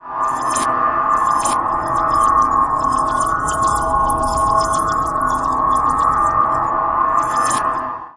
Vartanian Méréthy 2016 2017 Ovni
Selection of a portion of the sound
I copy paste the start of the sound 3 times (2 at the beginning and one at the end)
Then I add a wahwah effect.
Adding a new track
I add reverb + wahwah effect to this track
I copy paste and change the direction at the end with a amplification over the entire track 11.8 db
I add Ban and Treble and a Paulstretch Effect 3 effect
And a melt closing and opening melt.
Typologie de Pierre Schaeffer : Itération Tonique N'' puis Silence puis Son Continu Conplexe X
Analyse morphologique des objets sonores de Pierre Schaeffer :
1 ) Masse
Son cannelés
2 ) Timbre Harmonique
Acide
3 ) Grain
Rugueux
4 ) Allure
Mécanique
5 ) Dinamique
Graduelle
6 ) Profil mélodique
Serpentine
7 ) Profil de masse
Site : variation de hauteurs
science, fiction, sci-fi, alien, UFO, metal, vehicle